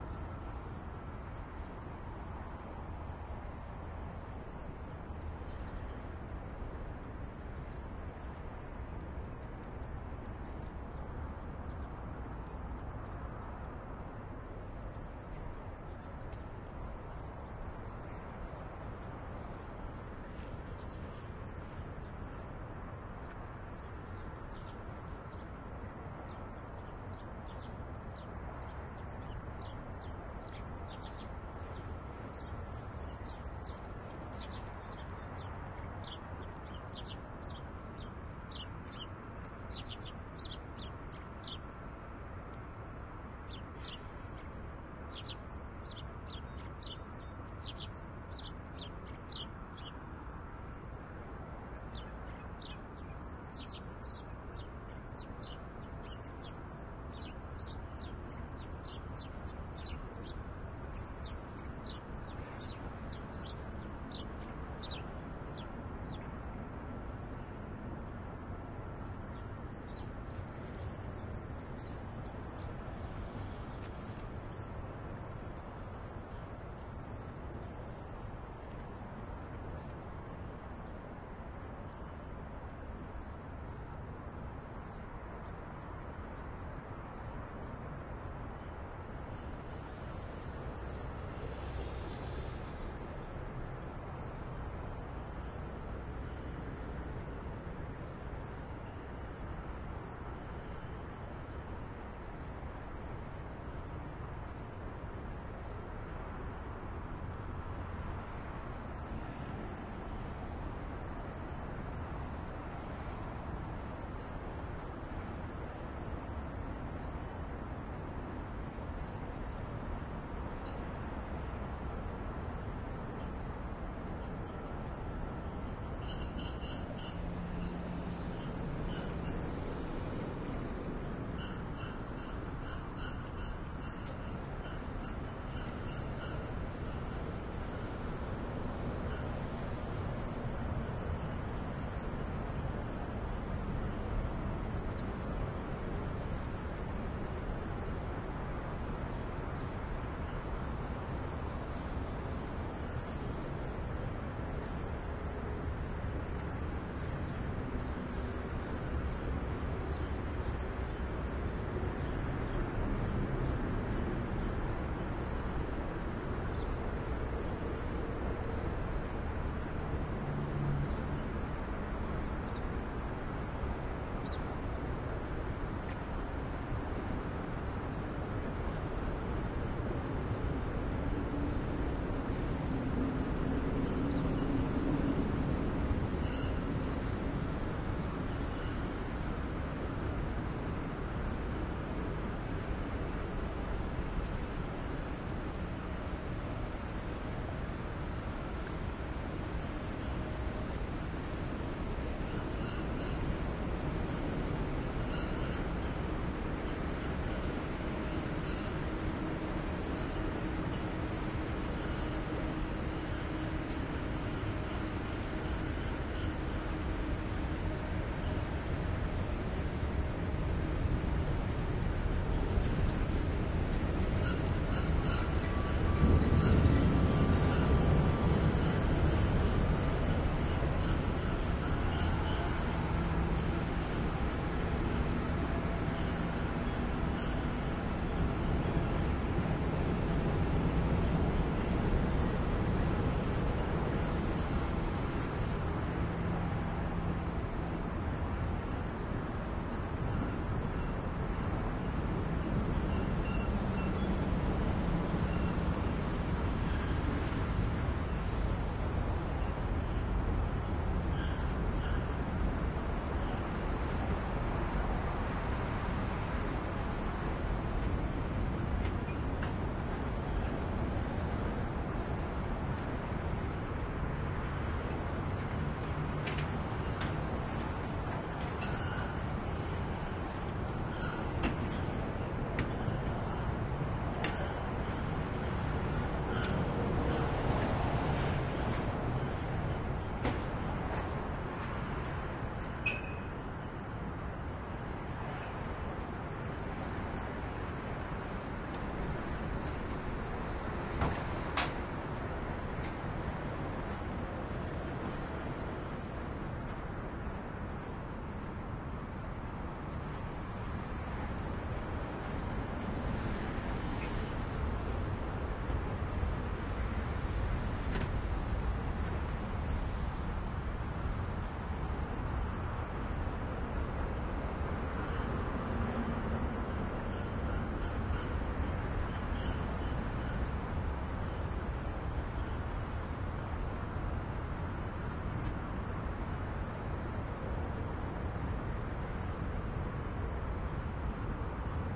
Walking along the East Bank Esplanade in Portland, at first I stop to listen to a sparrow that is quite close to me (if you wish to hear it quite well, use a high pass filter to remove the traffic noise), I continue walking, my footsteps are audible sometimes, the traffic noise is due to the fact that the walkway is directly next to the highway. Recorded with The Sound Professional binaural mics into a Zoom H4.
ambient, binaural, bird, cityscape, eslpanade, field-recording, footsteps, oregon, phonography, portland, purist, sparrow